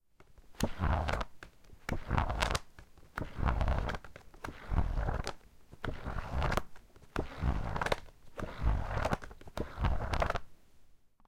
Flipping a Book
Pages of a book being flipped or rifled through. A paperback book was held in one hand while the other flipped through the pages in quick succession, making a fast and fairly abrasive noise as the final pages and back cover flip shut. The sound was recorded by holding the open side of the book close to the microphones of a Sony PCM-D50 recorder.
aip09, book, browse, flip, flipping, page, pages, turn